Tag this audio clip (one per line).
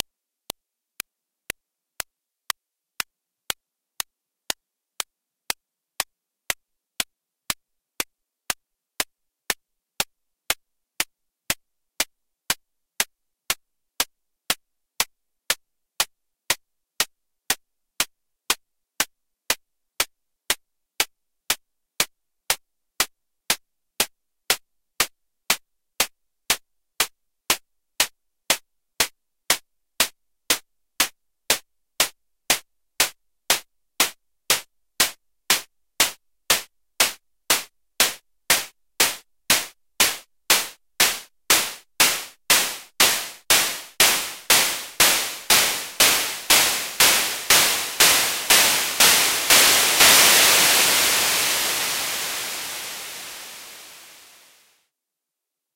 drums
Dub
electro
hihat
open
house
hi-hat
closed
drum
hihats
4x4-Records
Dubstep
minimal
hi
hats
hat
hi-hats